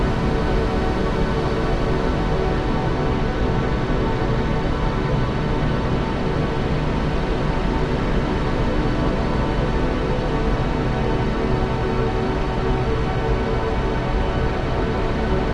Heavens Reprise
A heavenly loop with a strong angelic feel which also loops. The origin of this sample is a PaulStretched and edited version of one of my musical themes.
I imagine that this could be used for ambience for a godly entity...or an ascension.
acidized, angelic, background, choir, cinematic, easy-listening, heavenly, loopable, loop-flags, noise, soft